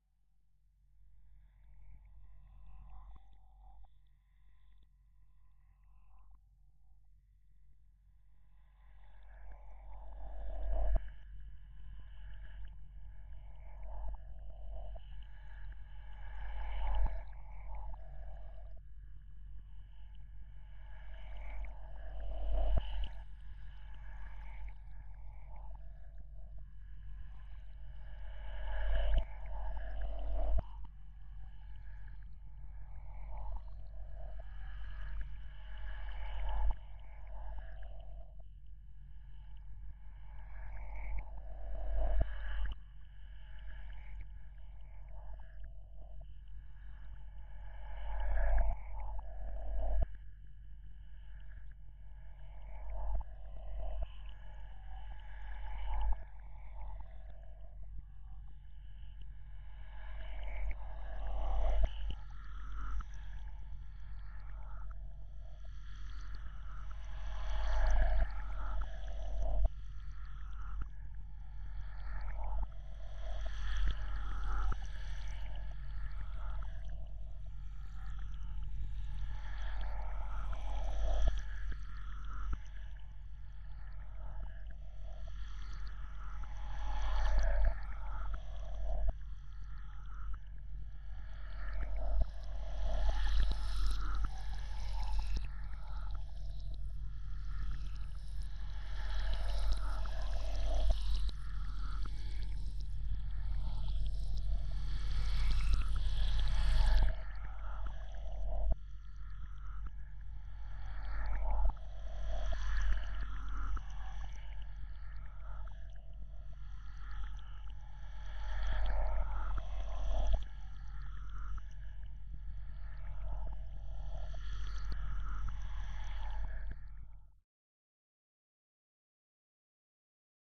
Drone Wet
this is part of a drone pack i am making specifically to upload onto free sound, the drones in this pack will be ominous in nature, hope you guys enjoy and dont forget to rate so i know what to make more of